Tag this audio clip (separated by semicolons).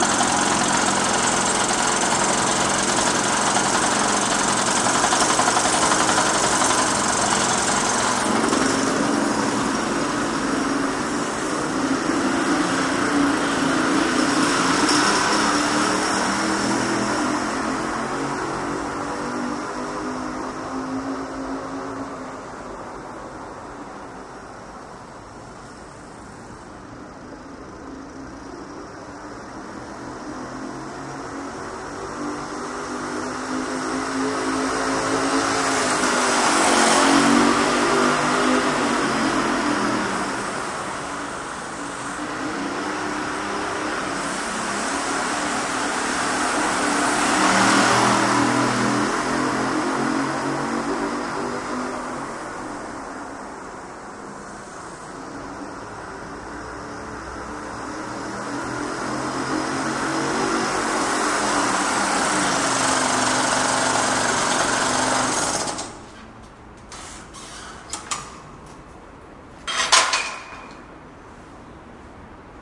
carpark; 3800; solex; mofa; velosolex; bicycle; oldtimer; moped; parkhaus